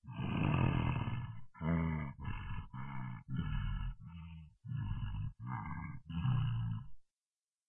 feu court
breathless, zombie, cartoon, loud, running, monster, dinosaur